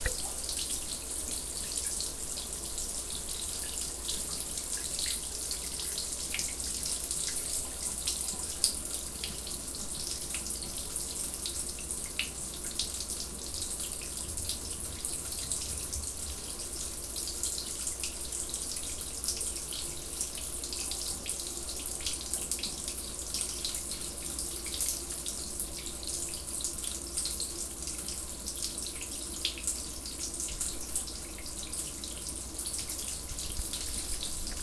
Tub close
tascam recording- close up to tub in small bathroom